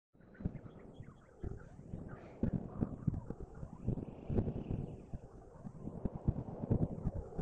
This is an edited sound of birds chirping.
edited, field-recording, bird